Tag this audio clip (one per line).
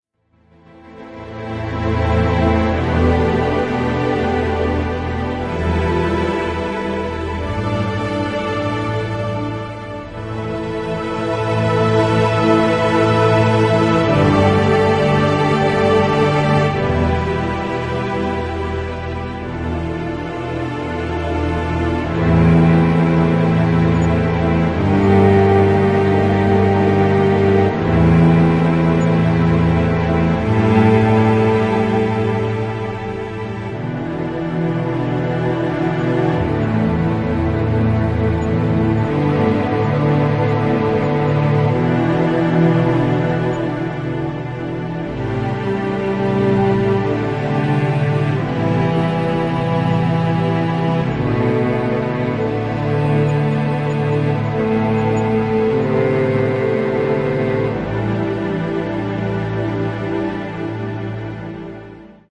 beautiful
cello
classic
classical
emotional
melancholic
music
orchestra
powerful
sad
song
strings
viola
violin